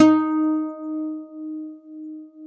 Looped, nylon string guitar note
acoustic, guitar, single-notes, nylon-guitar